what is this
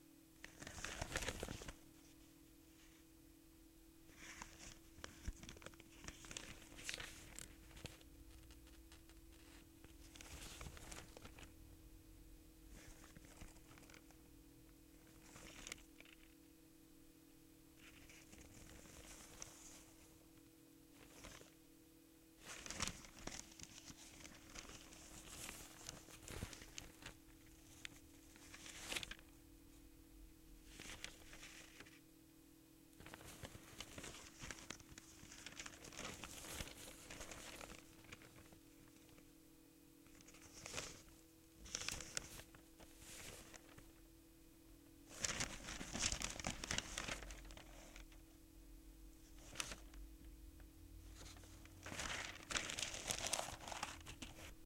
foley: opening, turning and folding newsprint
newspaper, newsprint, pages, paper, rustle, turn